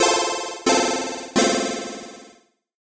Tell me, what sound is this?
Jingle Lose 01
An 8-bit losing jingle sound to be used in old school games. Useful for when running out of time, dying and failing to complete objectives.
8bit, Jingle, SFX, Old-School, Lose, Game, Video-Game, 8-bit, Death, Nostalgic